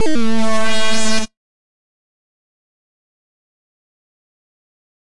Synth bleep
Bleepy synth sample.
Created from scratch with LMMS + Audacity.